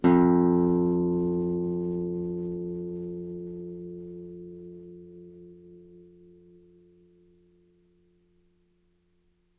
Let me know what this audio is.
F, on a nylon strung guitar. belongs to samplepack "Notes on nylon guitar".